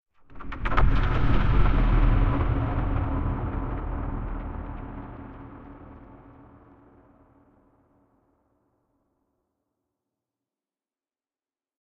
Sound design cinematic drone sweep with dark dragging or pulsating.
dark, drone, pulsating, sweep, trailers, whoosh, dragging, sound, sound-design, hits, swish, cinematic, design, stings, filmscore